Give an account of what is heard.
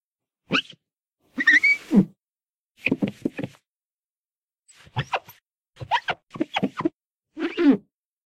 Wiping Window
glass, squeeky, window, windshield, wiping, wiping-window